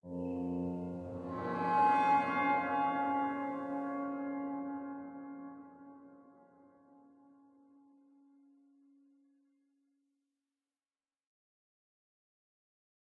Bowed electic guitar - Bbm7/F chord
Electric guitar played with a violin bow playing a Bbm7/F chord